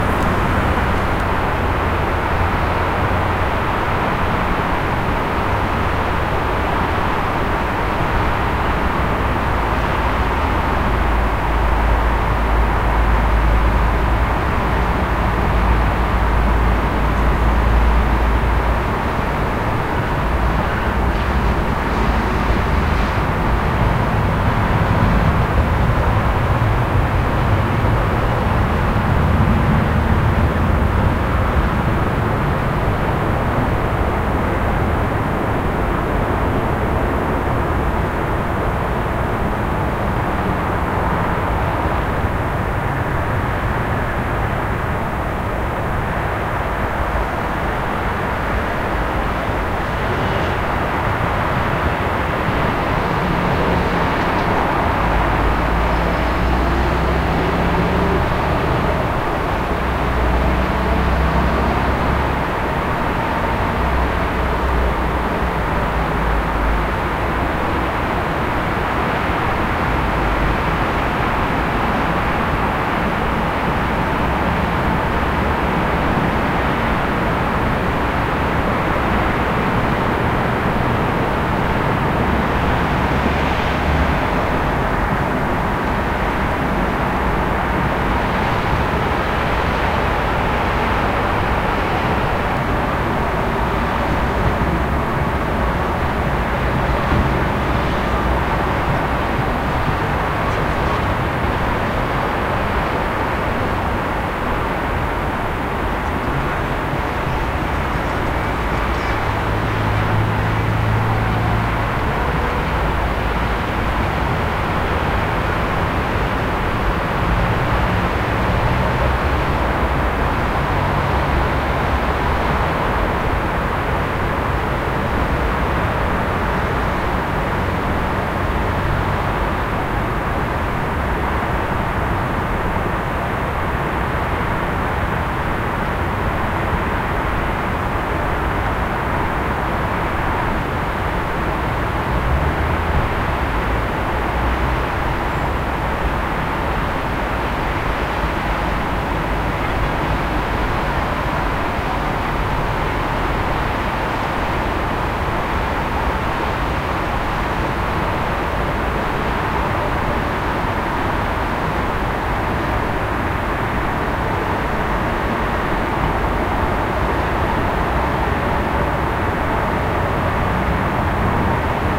City Ambience Distant Stereo
Slightly edited, very clean recording of city background noise.
Recorded myself as I couldn't find anything 100% suitable on here, for use in a film sound mix.
Recorded at the top of Humboldthain Bunker in Berlin, Germany on the 1st of December 2019. Recorded at night, no bird sounds, with the onboard mics on a Zoom H4n.
Take it for whatever you want. If you like it, please give a good rating and consider uploading some of your own sounds on here to help out other users!
field-recording, ambience, night, stereo, rooftop, city, berlin, atmosphere